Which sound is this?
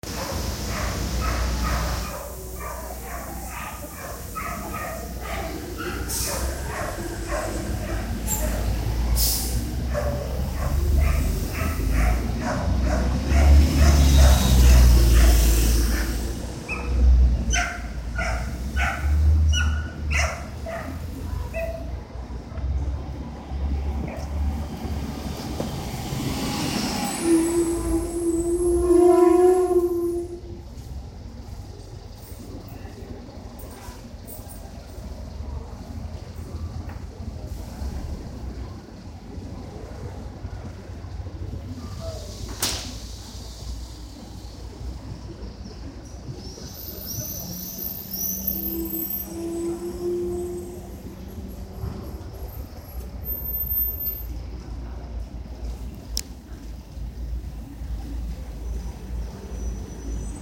VIIIagosto/h24 fermata bus - by Jiang Guoyin, Chen Limu
Progetto di rivisitazione di Piazza VIII Agosto a Bologna realizzata dal gruppo di studio dell'Accademia delle Belle Arti corso "progetto di interventi urbani e territoriali" del prof. Gino Gianuizzi con la collaborazione di Ilaria Mancino per l'analisi e elaborazione del paesaggio sonoro.
Questa registrazione è stata fatta venerdì di Maggio alla fermata dei bus da Jiang Guoyin e Chen Limu
ambience, Bologna, field-recording, h24, outdoor, people, soundscape, VIIIagosto